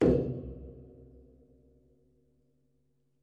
Tank of fuel oil, recorded in a castle basement in the north of france by PCM D100 Sony